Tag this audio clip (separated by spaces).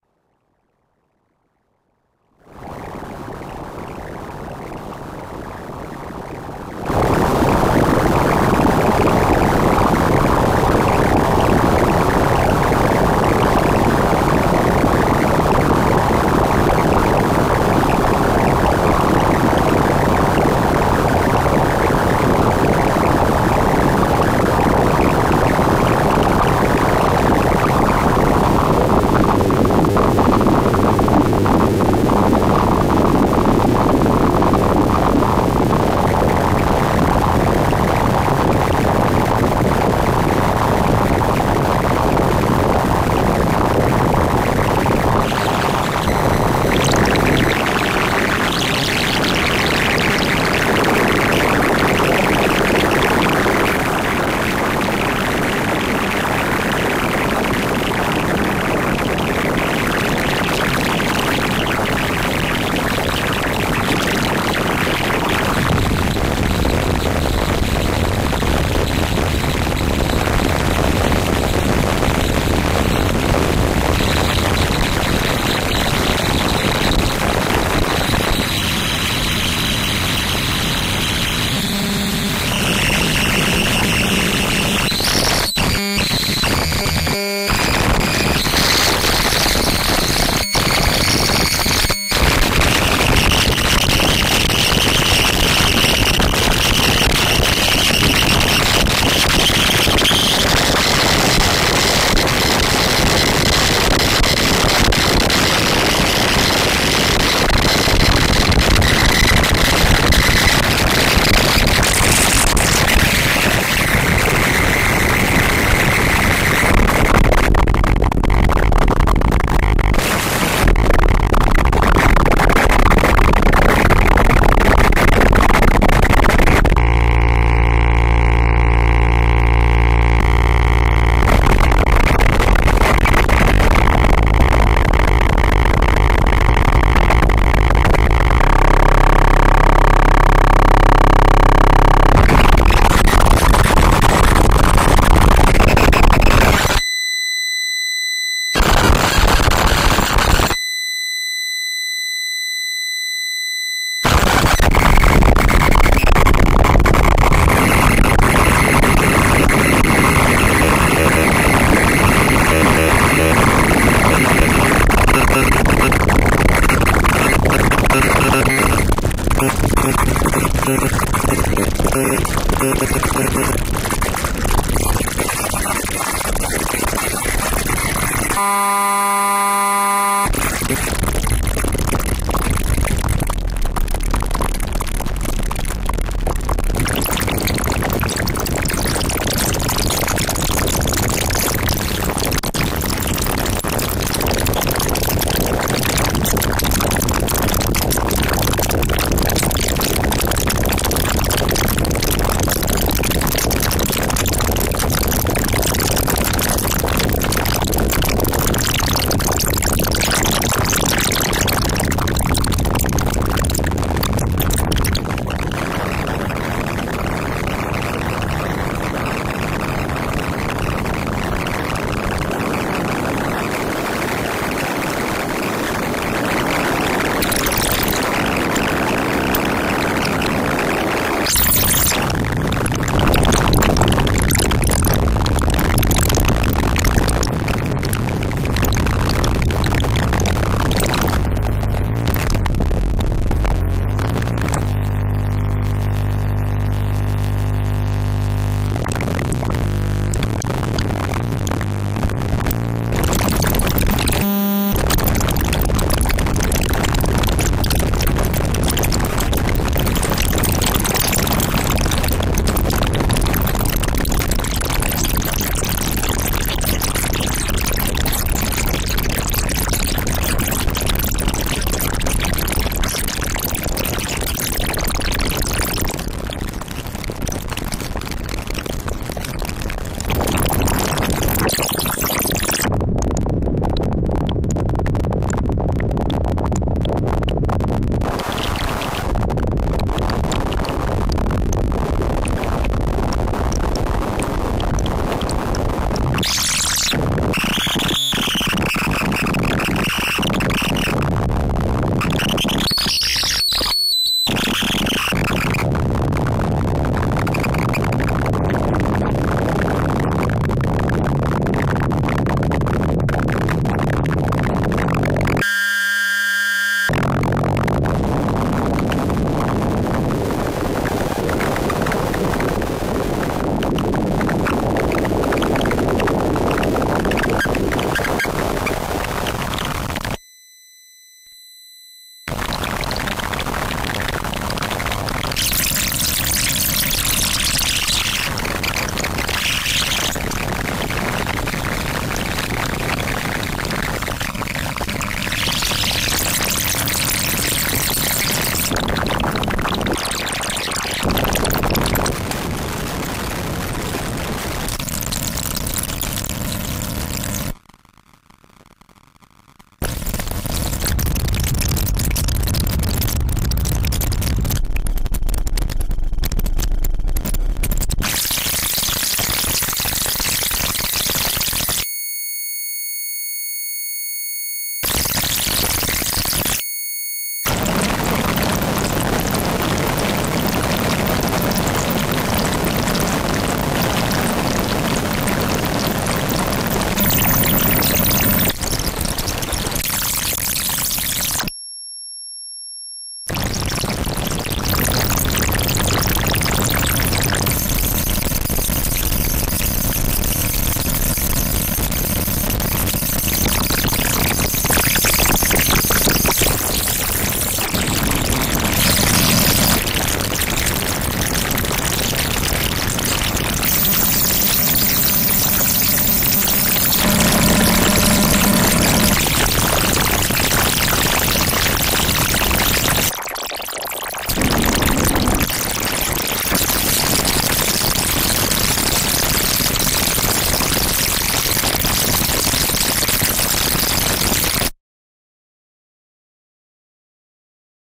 abstract angry bitcrush broken crunchy crushed destruction digital distorted effect electronic extreme glitch harsh hiss intense noise noisy sound-design squinched strange synth synthesized